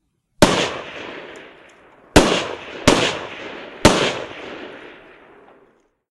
Sniper Fire
Sniper rifle shot sounds.